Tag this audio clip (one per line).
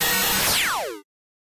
fi
laser
ray
sci
zap